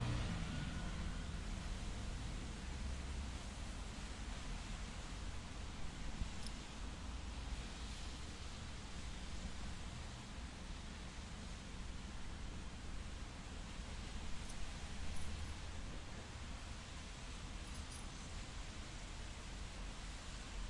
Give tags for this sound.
Ambiente; Ciudad; Avenida

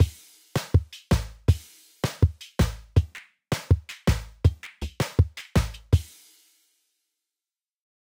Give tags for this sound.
zouk; drum; loop; beat

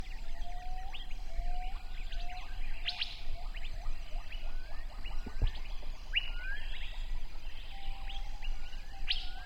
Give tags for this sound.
Asia; South; Cambodia; Jungle; Nature; Gibbon; East

